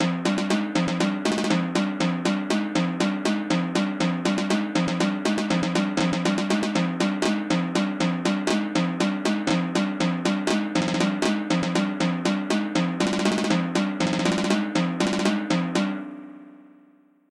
MIDI timpani piece for some heroic/menacing/comedy visual art or theatrical play.